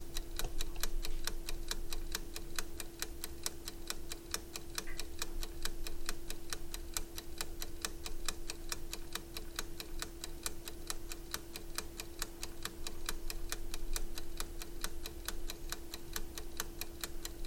Ticking Timer
The timer on an air fryer.